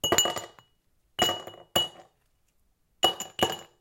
Ice cubes being dropped into a glass